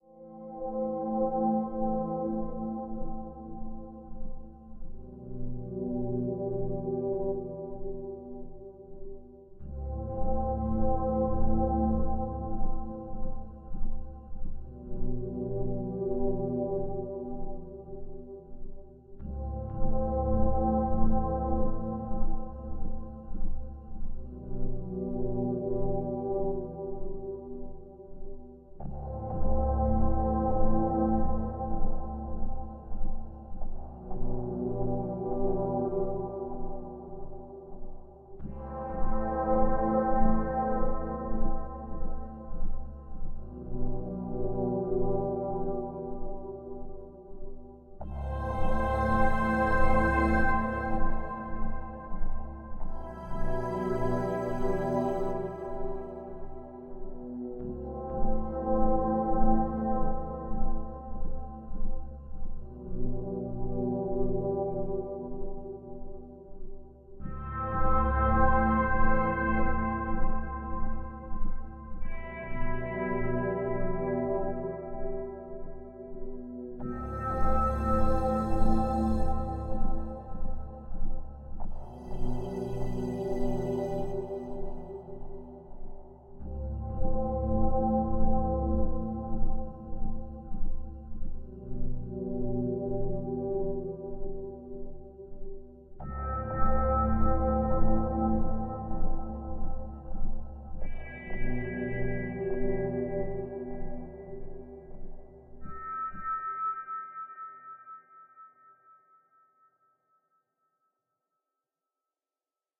For a sad or happy movie scene